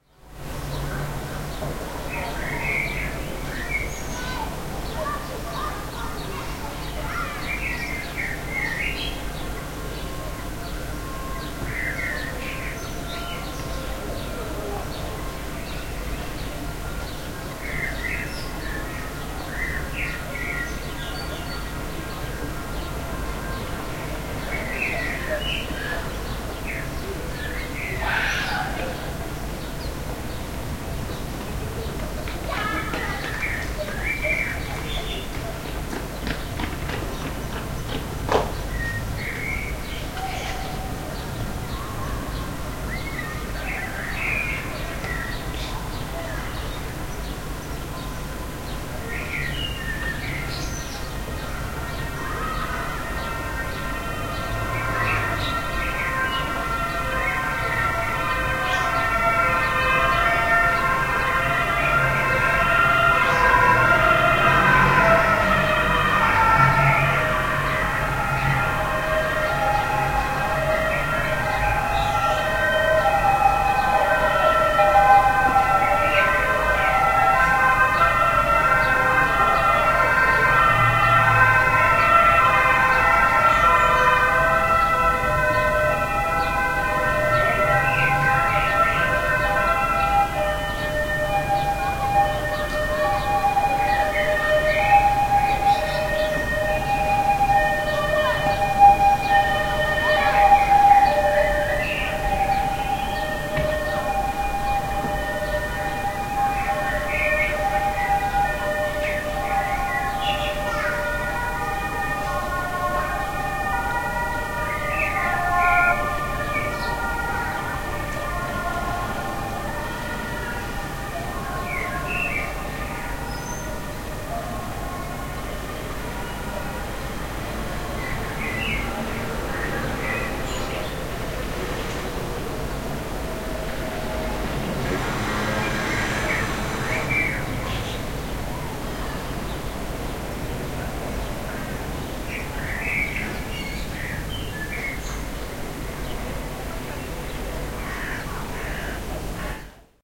Two police cars and the firebrigade arrive somewhere close to my house. Something must have happened but I haven't found out what. After a while an ambulance and another police car are heading for more trouble somewhere else. Birds (sparrows, a blackbird and a crow) keep singing and children keep playing. Recorded with an Edirol cs-15 mic plugged into an Edirol R09 in the afternoon of the thirteenth of June 2007 in Amsterdam (the Netherlands)
ambulance, field-recording, human, noise, police-car, street, street-noise